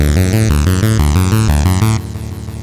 Ok, I am trying to make an epic 91 bpm neo classical instrumental and needed galloping synth triplets. This is what I got. Some were made with careless mistakes like the swing function turned up on the drum machine and the tempo was set to 89 on a few of the synth loops. This should result in a slight humanization and organic flavor.
bpm, loop, 91, synth